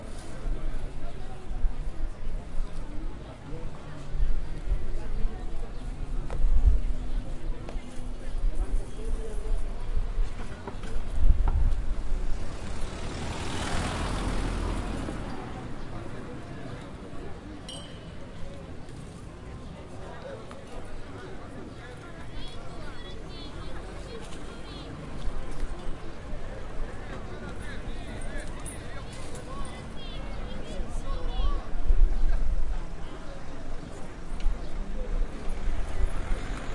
Flea market in Lisbon with some traffic noise..